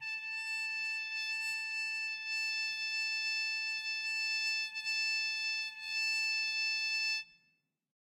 One-shot from Versilian Studios Chamber Orchestra 2: Community Edition sampling project.
Instrument family: Brass
Instrument: Trumpet
Articulation: harmon mute sustain
Note: A5
Midi note: 81
Midi velocity (center): 31
Room type: Large Auditorium
Microphone: 2x Rode NT1-A spaced pair, mixed close mics
Performer: Sam Hebert